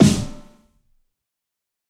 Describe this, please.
Fat Snare EASY 038
This is The Fat Snare of God expanded, improved, and played with rubber sticks. there are more softer hits, for a better feeling at fills.
drum, fat, god, kit, realistic, rubber, snare, sticks